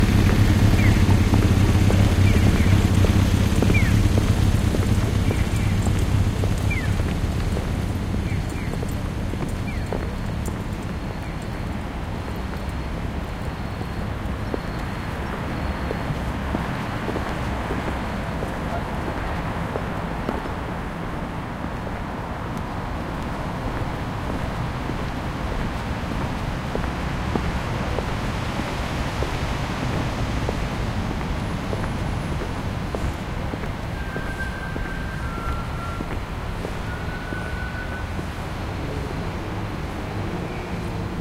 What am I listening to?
Japan Tokyo Uchibori-Dori Evening Walk Traffic Engine 2
One of the many field-recordings I made in Tokyo. October 2016. Most were made during evening or night time. Please browse this pack to listen to more recordings.
ambiance, ambience, ambient, atmosphere, cars, city, city-noise, evening, exploring, field-recording, Japan, japanese, night, street, Tokyo, tourism, traffic, urban, walking